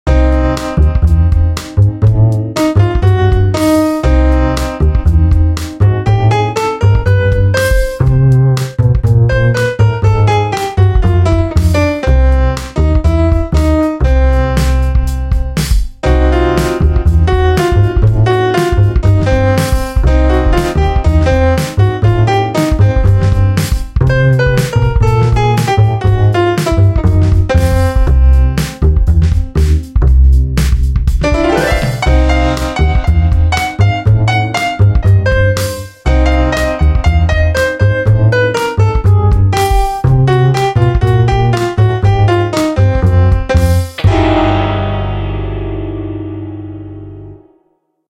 Music I made in GarageBand for something called Victors Crypt.Use it if you wanna. This turned out a bit jazzy much because of the double bass and piano. Could work great in a detective-moment in a club in the 30's, crime-stuff. Hope you like it.